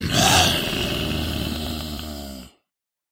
Me growling angrily into my mic to immitate a monster.
beast, growl, creature, monster